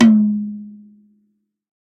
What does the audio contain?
Meltom-Pseudo-Mars-8-G#-5-P
This is an 8" tom drum off the Mapex Mars drumkit, designed to be used in a General MIDI programme 117 (melodic tom) sampler.
birch, General, GM, drum, melodic, tom